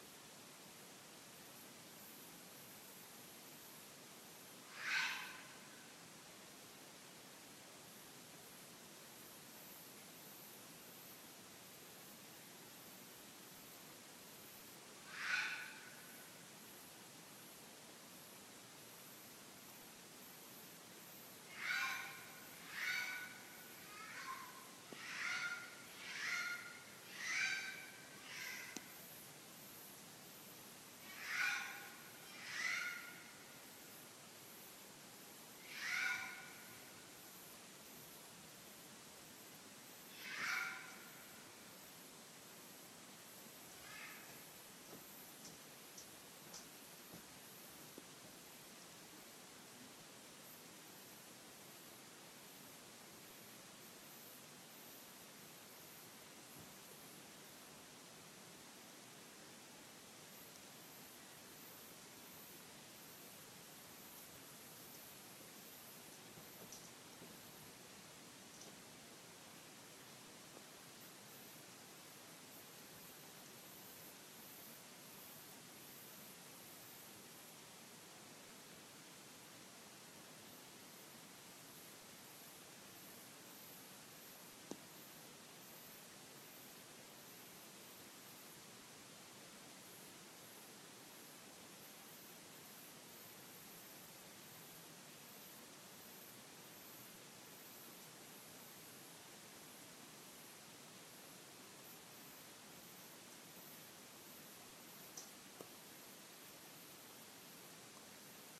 Red Fox barking at night in the woods. iPhone 5s. Northern California August 2016 Field Recording.
northern-california, woods, field-recording, wild-animal, forest, animal, nature, red-fox, Fox